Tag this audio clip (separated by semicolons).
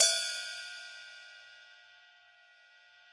1-shot; cymbal; hi-hat; velocity